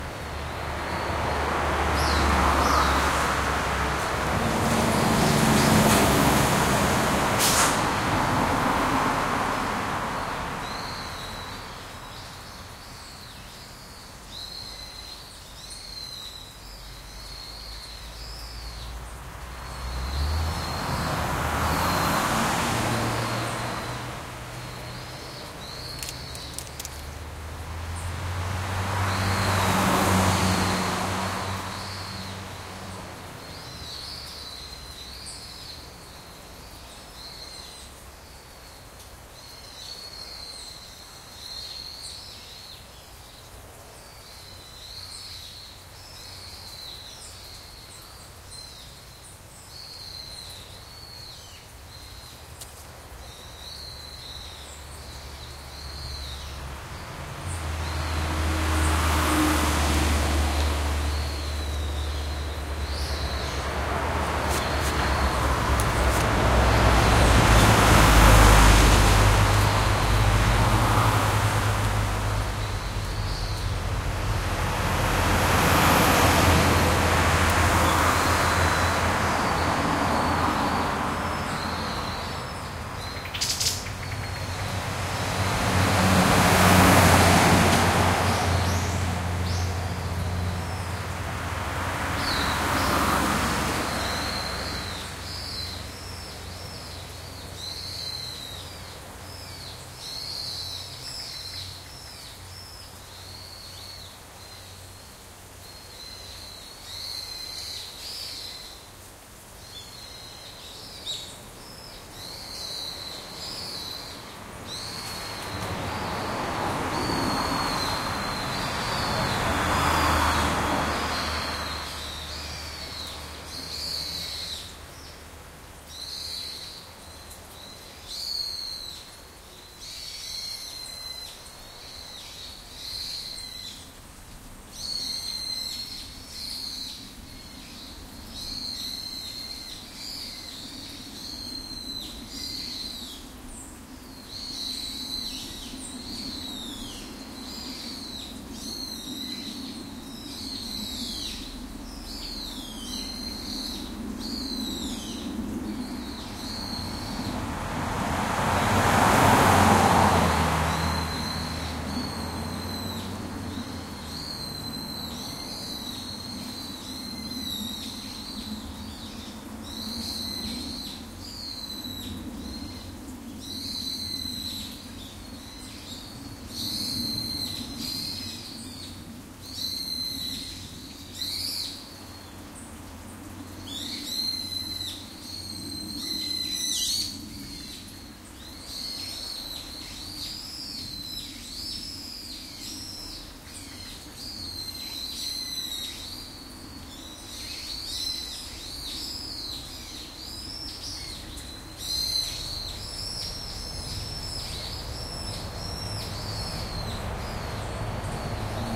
Japan Yukinoura Bamboo Forest and Road
In Yukinoura (town), Saikai (municipality), Nagasaki (prefecture), Kyushu (island), Japan (country). Bamboo forrest beside of the big street leading in and out of the town. Recorded in autumn, Nov 21st, 2016, around 16:30 (4:30 PM).
animals, bamboo, bamboo-forrest, birds, bus, cars, chirping, field-recording, forrest, Japan, Japanese, Kyushu, Nagasaki, nature, passing-by, Saikai, squeaking, squeeking, town, traffic, trees, trucks, woods, Yukinoura